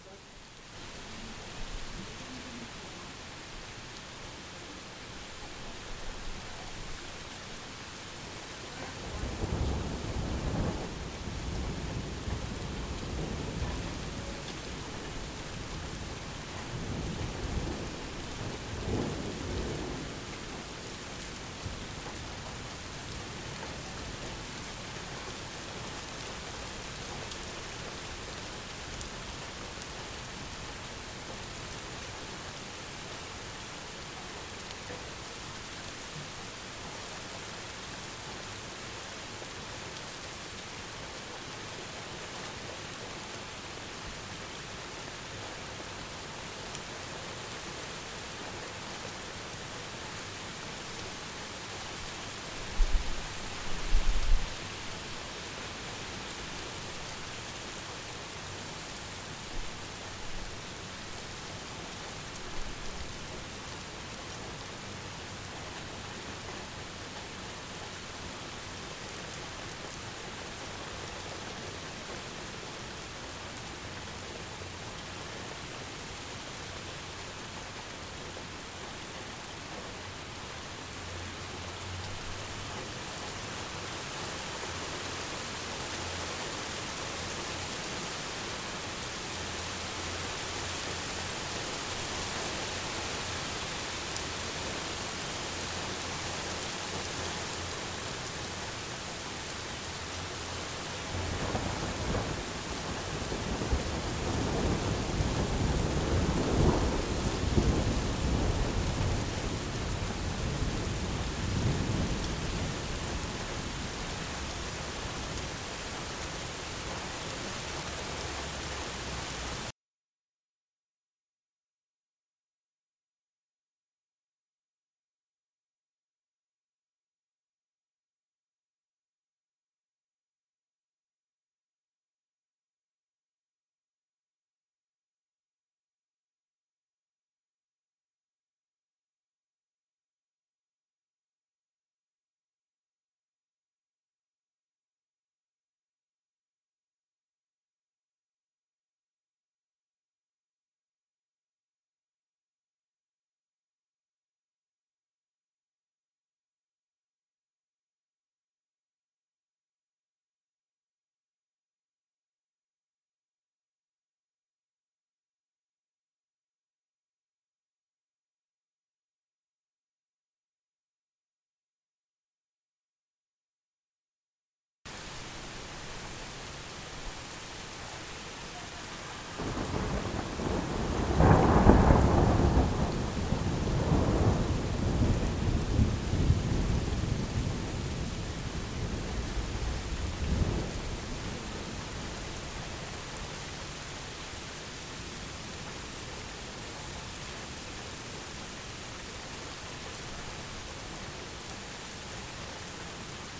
Rain, Thunder
Rain and Thunder
weather, storm, thunderstorm, Thunder